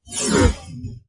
BS Scrape 6
metallic effects using a bench vise fixed sawblade and some tools to hit, bend, manipulate.
Effect, Grate, Grind, Metal, Rub, Scrape, Scratch, Screech, Scuff, Sound